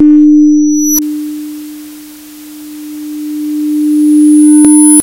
I have generated a sound ( sinusoidal, 300Hz) and I have altered it with the level tool.
Then, I have added a pluck ( pitch 114) and reversed it.
I have created a “white noise” which I have stuck after the pluck.
Finally, I have generated a sound ( sinusoidal, 1000Hz). I have put it at the end and altered it with the level tool.
I used Audacity.
ambient, artificial, experimental, noise